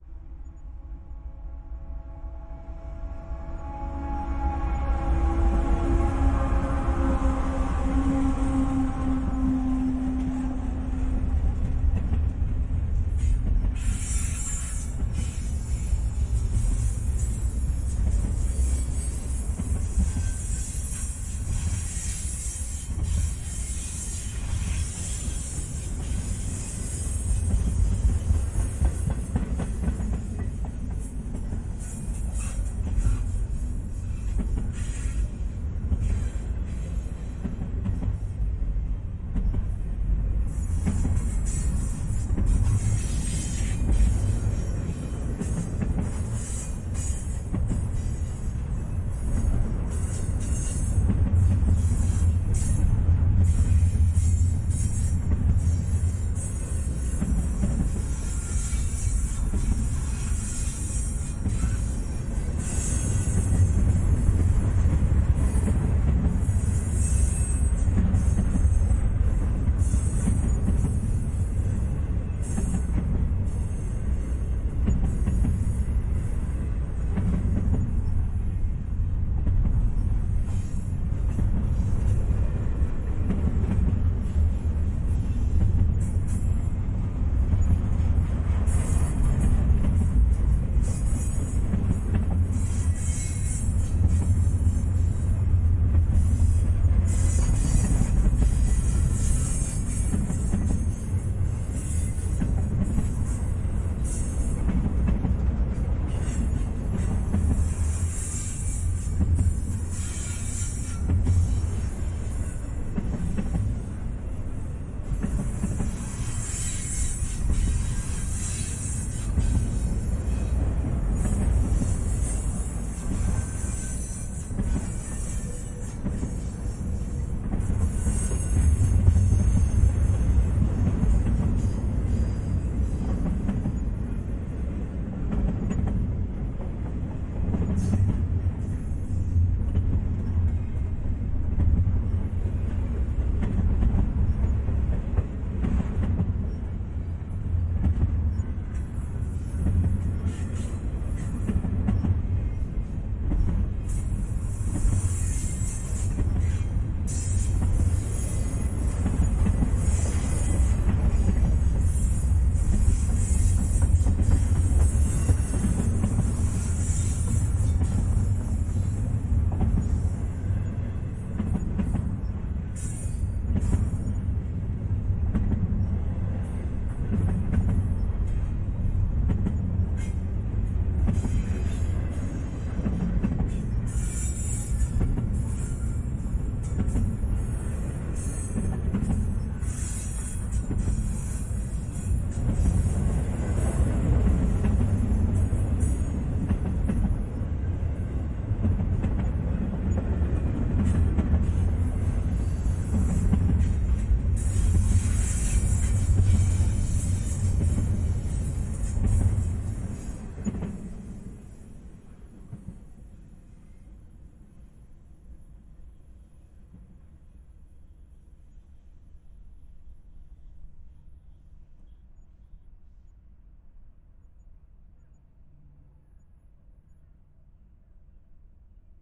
Freight Train Slow2 - Mixdown
real trains passing by. Zoom H6n onboard XY stereo mics, MKE600, AT2020 combined in stereo mixdown. Used FFT EQ to really bring out rumble.